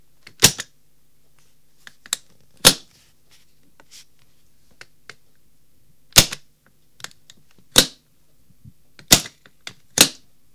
Those clips on eachside of a suitcase by which you open them up. i open and close them several times